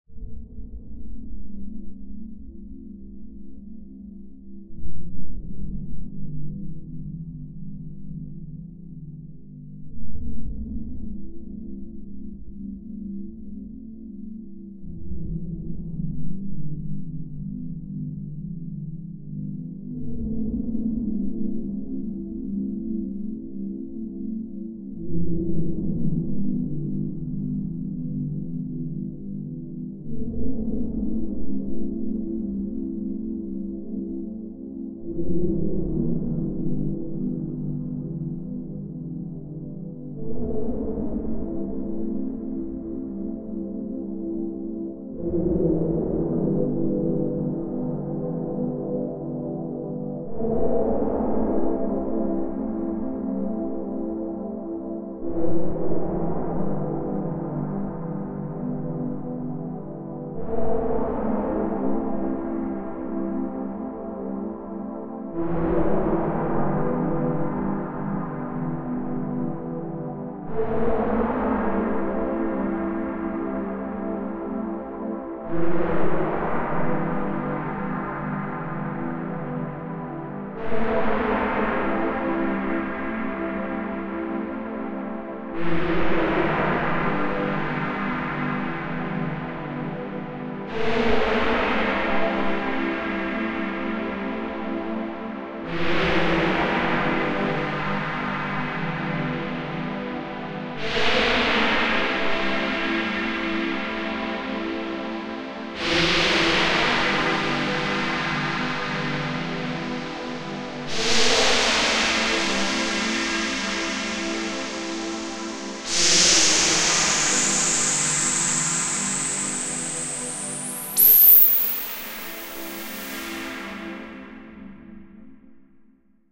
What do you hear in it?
Anxious Swell
Processed Synth made From a bunch of random oscillator waves in Operator.